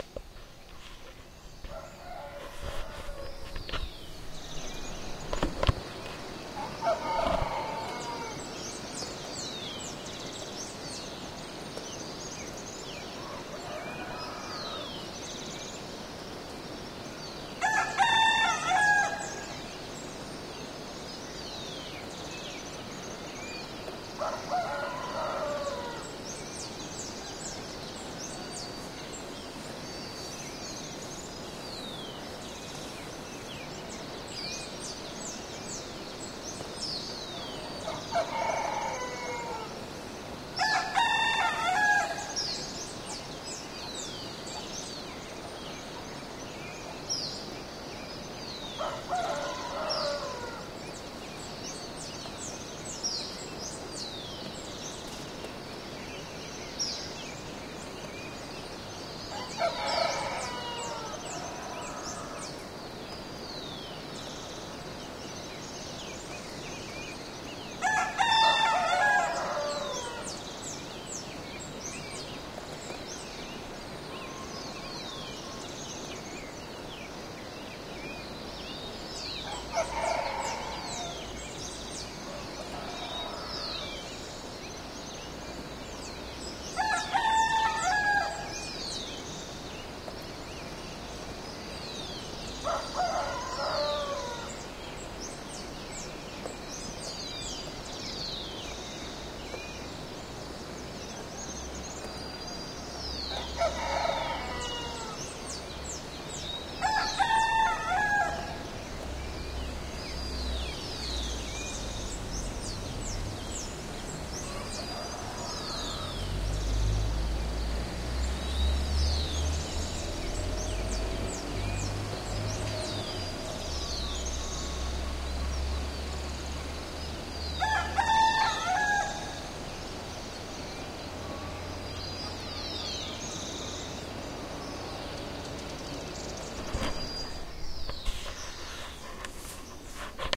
Morning in Tingo, Peru, rooster
Early Morning in Tingo, a small village in the Northern Andes of Peru.